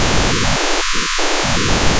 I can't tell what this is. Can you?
Pixilang Logo on spectrogram
I took Pixilang Logo and put into Audiopaint
Image, Picture, Pixilang